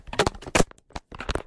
telefon kapak kapama
This is the sound of a Nokia e72. Recorded while turning the back cover off. What I mean by back lid is the lid of the section where the battery and sim cards are.